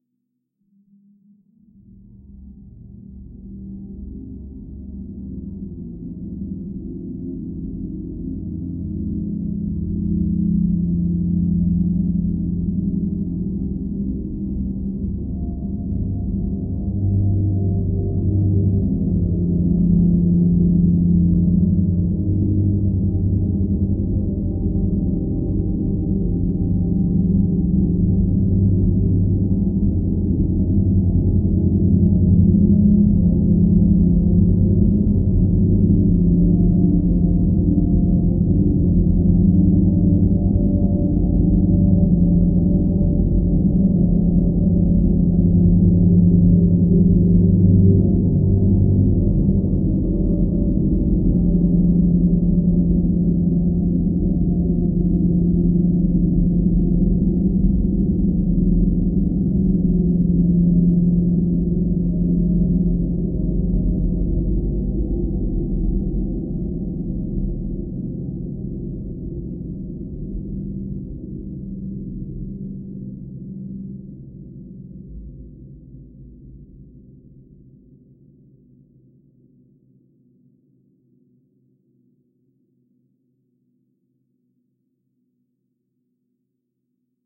LAYERS 010 - Dreamdrone-06
LAYERS 010 - Dreamdrone is an extensive multisample package containing 108 samples. The numbers are equivalent to chromatic key assignment. The sound of Dreamdrone is already in the name: a long (over 90 seconds!) slowly evolving dreamy ambient drone pad with a lot of movement suitable for lovely background atmospheres that can be played as a PAD sound in your favourite sampler. Think Steve Roach or Vidna Obmana and you know what this multisample sounds like. It was created using NI Kontakt 4 within Cubase 5 and a lot of convolution (Voxengo's Pristine Space is my favourite) as well as some reverb from u-he: Uhbik-A. To maximise the sound excellent mastering plugins were used from Roger Nichols: Finis & D4.
ambient, drone, multisample, soundscape, artificial, evolving, pad, dreamy, smooth